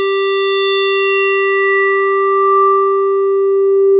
Multisamples created with Subsynth.
multisample, square, synth